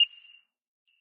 Short beep sound.
Nice for countdowns or clocks.
But it can be used in lots of cases.
beep, beeping, bit, computer, counter, digital, f, hit, menu, select